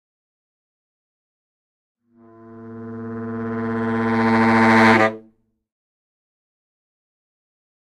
A recording of a sax growl on a baritone saxophone, played by my brother, Matthias, in the occasion of a soundtrack-battle 2007. We won the second prize!